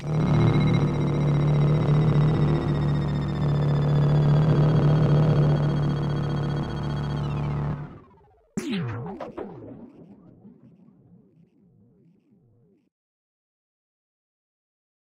a robot tank takes aim and fires.
3 synthesizers used, subtractive synthesis and a graintable synth for the shot. Mastertrack edited with an EQ.
cannon, future, gun, laser, robot, science-fiction, shot, tank, war